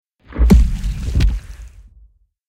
gore kik 4
Some sounds designed from only animal sounds for a theatre piece i did.
gore, boom, horror, kick, stinger